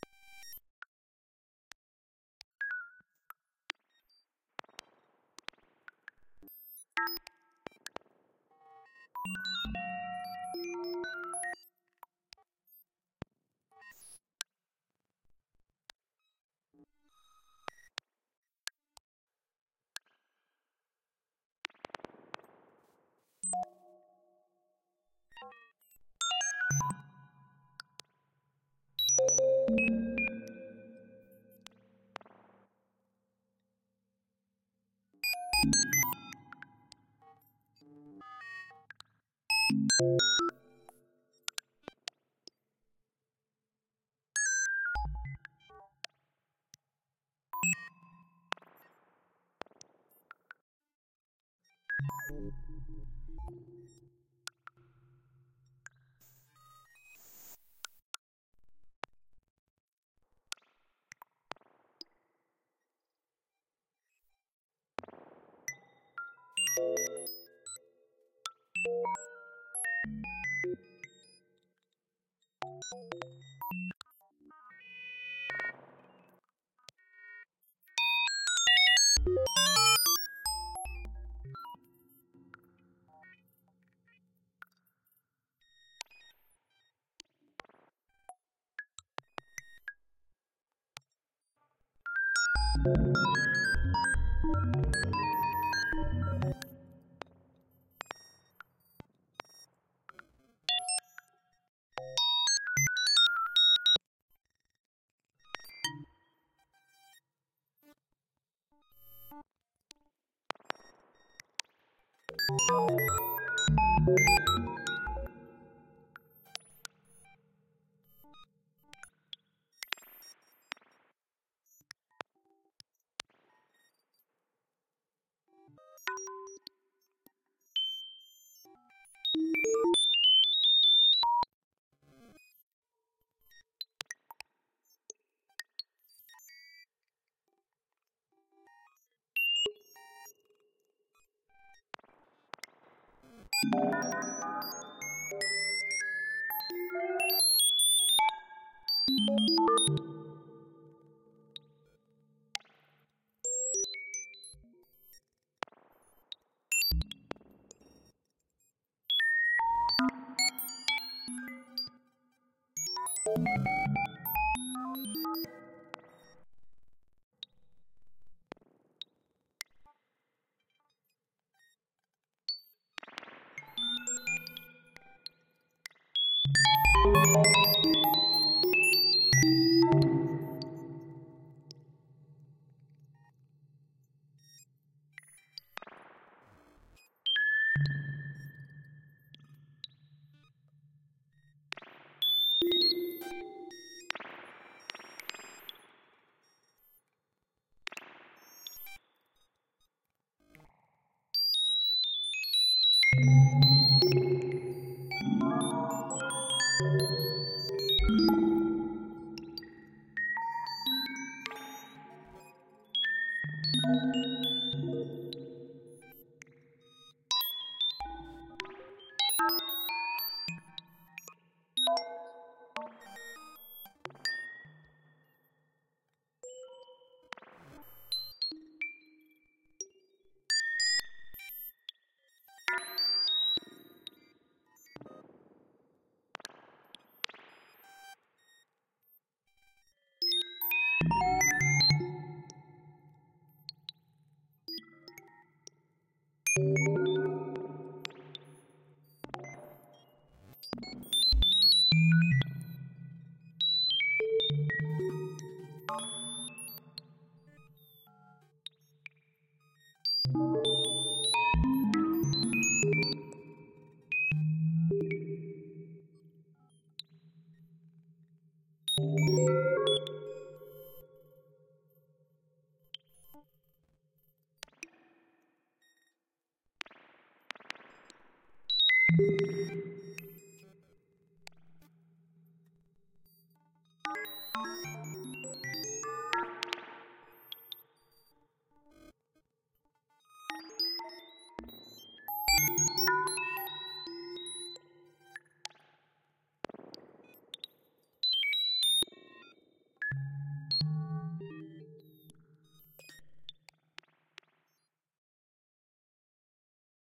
A recording I made with VCV Rack.
aleatoric, digital, percussion, percussive, random, randomness, sparse, synth, synthesizer